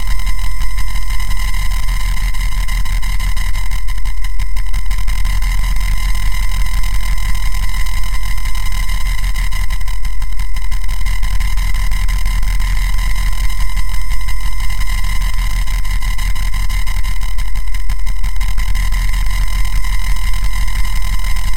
a collection of sinister, granular synthesized sounds, designed to be used in a cinematic way.